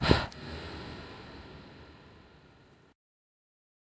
Recorded in SunVox with applying some equalizers, filters and a reverb.